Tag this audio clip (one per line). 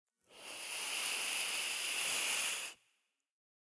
nature
snakes
snake
rainstick
snake-hiss
wildlife
foley
gentle
hiss